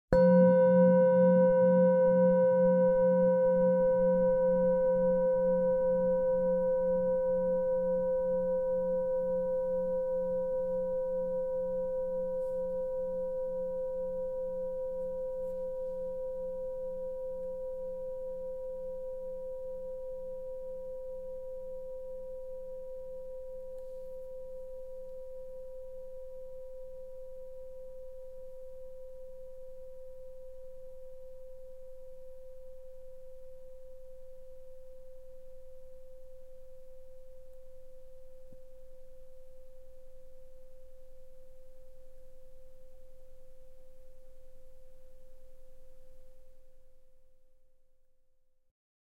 singing bowl - single strike 6
singing bowl
single strike with an soft mallet
Main Frequency's:
182Hz (F#3)
519Hz (C5)
967Hz (B5)
mic-90, record, singing-bowl, soft-mallet, Zoom-H4n